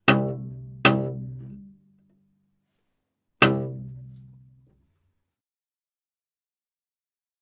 Concrete Cello 12 Hard pluck
The "Concrete Cello" pack is a collection of scraping, scratchy and droning improvisations on the cello focussing on the creation of sounds to be used as base materials for future compositions.
They were originally recorded in 2019 to be used in as sound design elements for the documentary "Hotel Regina" by director Matthias Berger for which I composed the music. Part of the impetus of this sampling session was to create cello sounds that would be remiscent of construction machines.
You can listen to the score here :
These are the close-micced mono raw studio recordings.
Neumann U87 into a WA273 and a RME Ufx
Recorded by Barbara Samla at Studio Aktis in France
design, sul, mono, string, film, sound, objet-sonore, imitation, ponticello, bow, scrape, concrete, quartet, Cello, object, raw